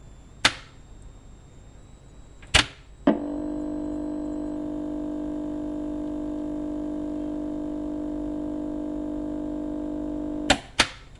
The sound of an ancient black and white tv being turned on an off. This machine is so old there is a loud mains hum when it is turned on.